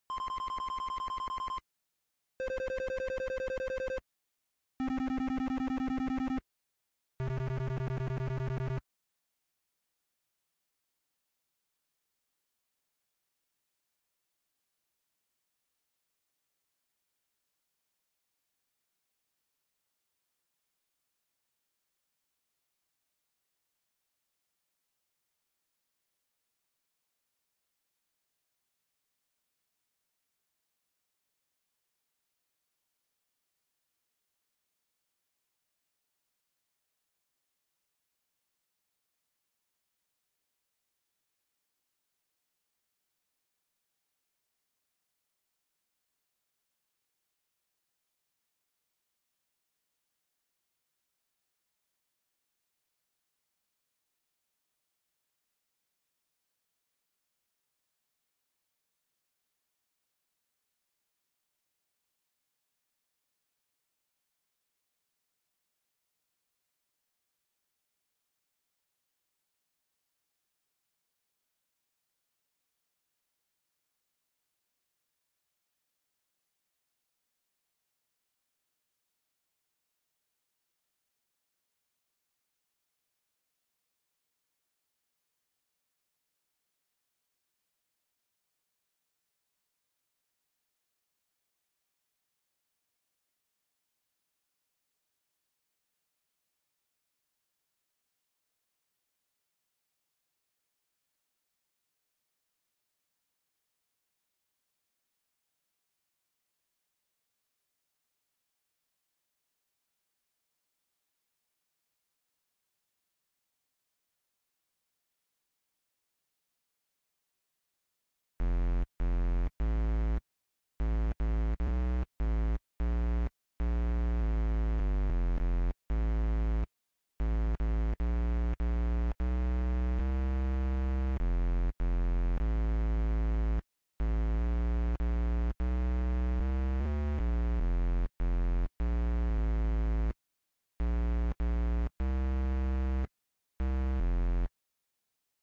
Target Lock 150 Beep
A target lock beep (in my mind similar to the beeps heard in the Death Star strategy session in Star Wars) playing at 150 BPM.
beep, console, HUD, laser, Lock, Sci-fi, ship, space, star, Target, Urgent, wars